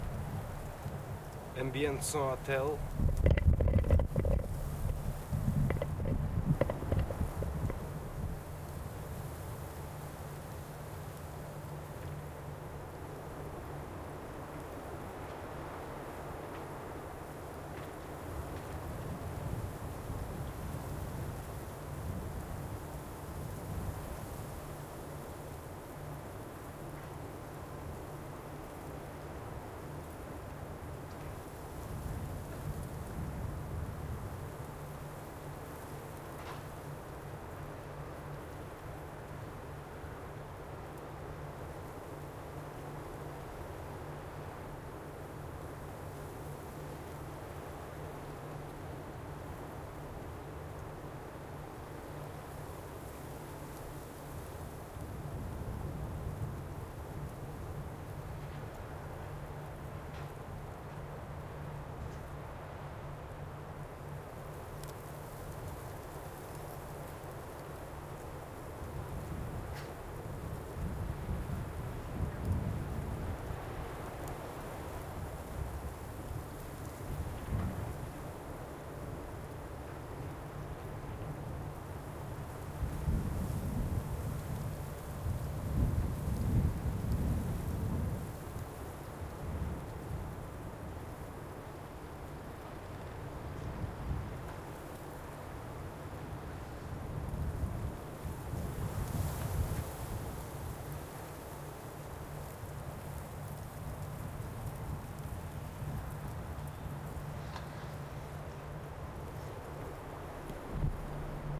Wind Ambience near the sea | Stereo Ms
ambience, tree, birds, near, ambiance, field-recording, wins, wind, atmosphere, leave, stereo, trees, ms, ambient, forest, sea, general-noise, nature